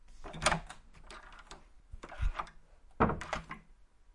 Opening, closing door slower
Slower recording of me opening my door, then closing it. Close HQ recording
closing, open, slam, old, door, opening, shut, wooden, close, doors, shutting